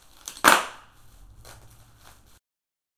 Wrapping, packaging and protecting are arts integral to the smooth flow of goods in an era of globalized consumption n production. Bubble wrap is not bubble wrap; its a protective layer, a thin filament to ensure satisfaction in consumption and the smooth continuation of the social.
Popping Bubbly is the sound of bursting the immunological layer. It was recorded with a Tascam DR100mkii. It's bubble wrap.